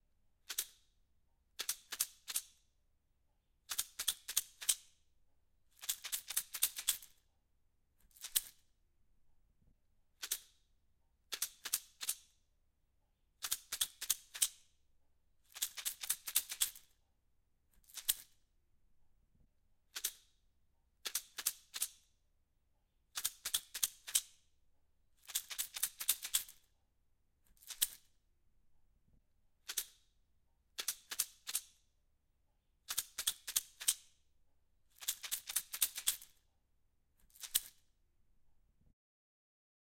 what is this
Toothpick Holder Shacking
Me shaking a toothpick holder
OWI, Shacking